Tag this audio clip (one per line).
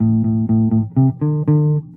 bass
sample
electric